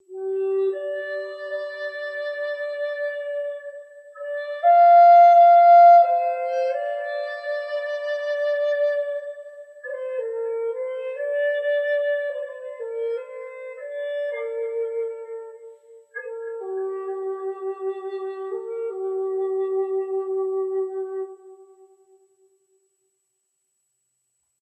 eagle feather
Another of my native american flute clips. This is a short clip I took from one of my original songs. It's just a few notes unlike the others that I have which are longer. This saves you having to write and ask although I do love to hear from people who have enjoyed my uploads here.
meditation, flute, sad, soothing, native, melody, american, indian, peaceful